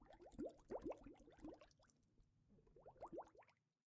Water Surface Bubbles
Bubbles, water, foley, tone, high